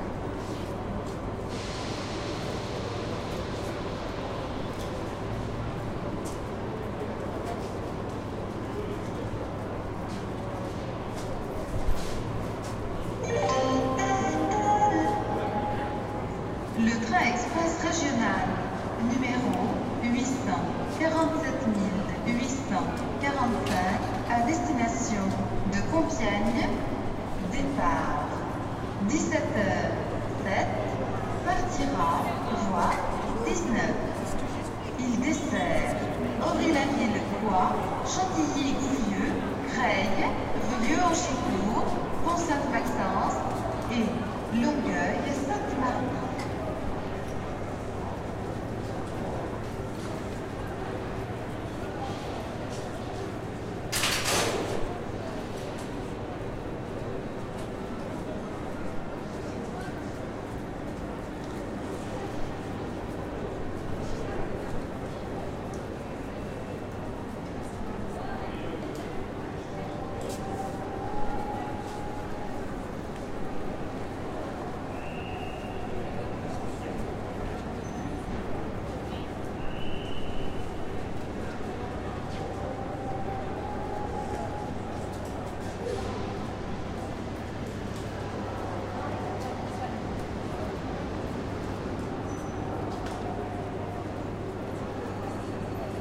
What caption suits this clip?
Mono recording of an announcement plus some ambience at the train station Gare du Nord in Paris. I recorded this on a wednesday afternoon in April. The recording was done with a Sennheiser ME64 mono mic and a Fostex FR-2 field recorder.